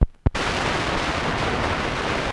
The sound of the stylus jumping past the groove hitting the label at the center of the disc.
noise, record, analog